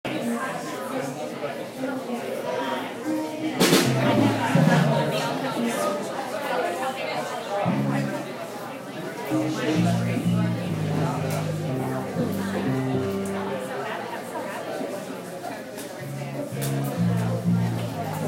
Crowded Café Ambience
This is the sound of a crowded café at night with a jazz band warming up in the background. It was a good night.
Crowded, coffee-shop, jazz